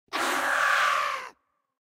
Short processed samples of screams